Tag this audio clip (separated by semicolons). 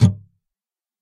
guitar; acoustic; bar-chords; chords; nylon-guitar